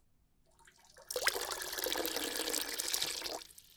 Dropping Water v2
Just someone dropping water into water
Drop, Drops, water, Splash